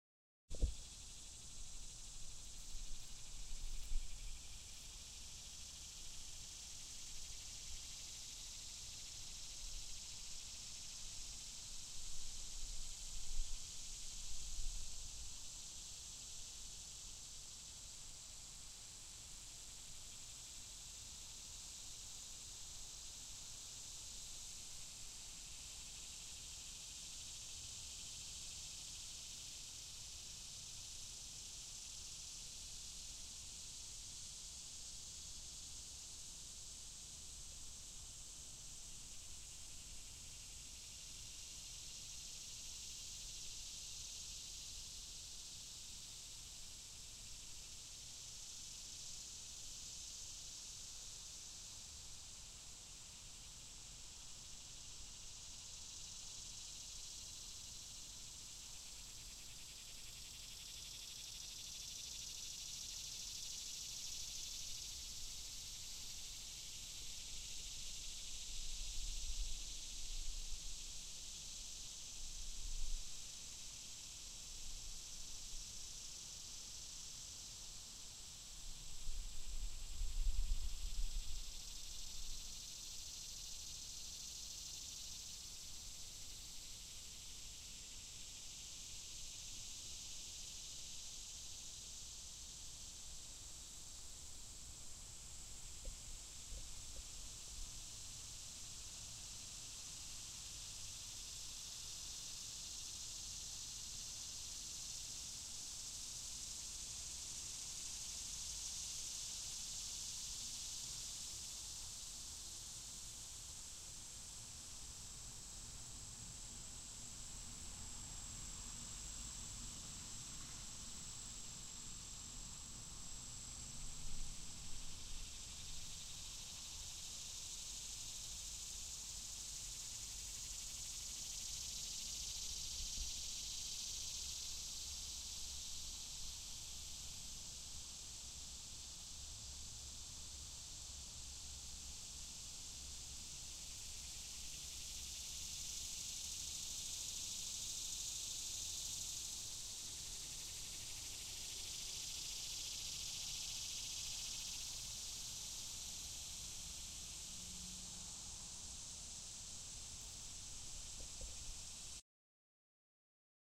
Cicadas of Central Jersey 5
ambiance, field-recording, cicadas, new-jersey, h5